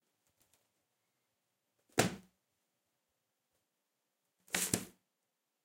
Drop 2 books on table
Sound of one book dropped, and then two books being dropped on a table.
table, wood, impact, book, books